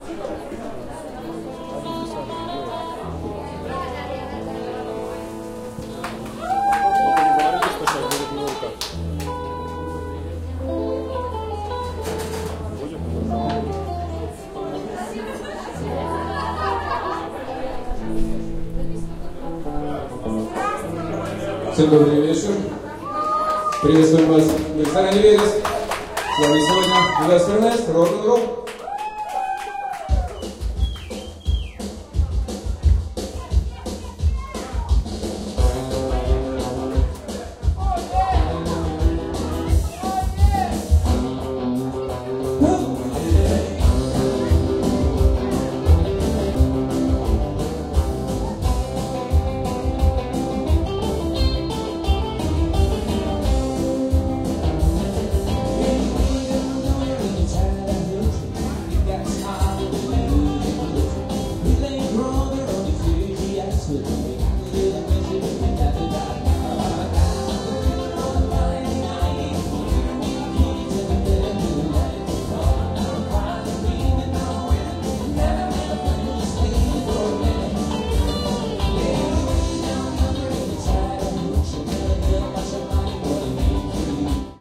pub Vegas3
Atmosphere in the beer restaurant "Vegas" in the Omsk, West Siberia, Russia.
People drink and chatting and having fun, clinking glasses, dishes...
Musical show starts. Guitar plays "Murka" and vocalist presents the group "Western S" and begins rock'n'roll.
Recorded: 2012-11-16.
AB-stereo
2012, beer, beer-restaurant, chat, clinking, clinking-glasses, dishes, drink, drunk, fun, glass, guitar, music, noise, Omsk, people, pub, restaurant, rock-n-roll, Russia, song, Vegas, West-Siberia